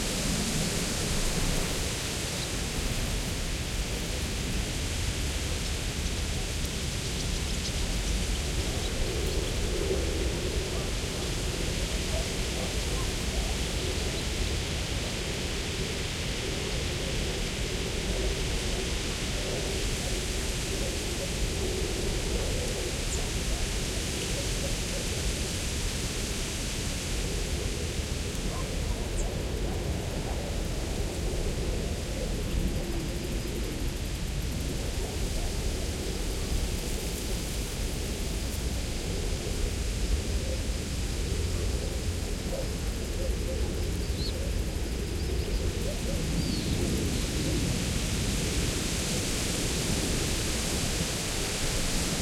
This is the recording of an empty field surrounded by forests.
Recorded with Sound Devices 302 + 2x Primo EM172 Omnidirectional mics. This recording is perfectly looped
ambience, birds, calm, field, nature